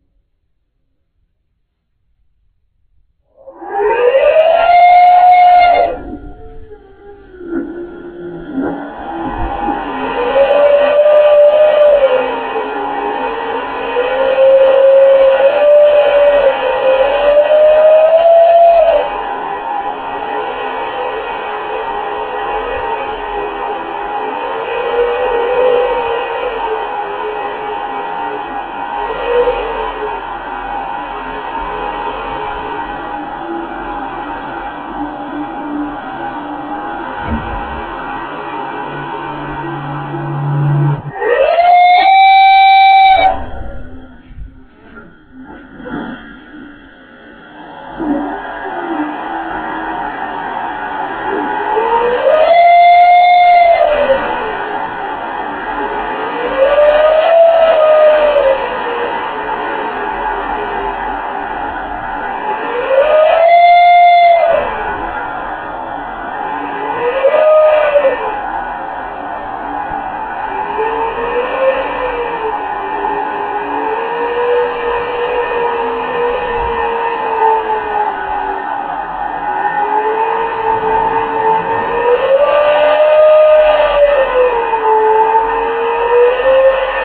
Some years back I was on vacancy in Crete. Wone of those days it began to blow hard and the wind c ontinued to accelerate until at midnight full storm. We couldn't sleep because a ventilation tube in the building generated a annoying kindawhistling.I recorded the sound with my Little Nikon Coolpix. I had to process the sound a Little.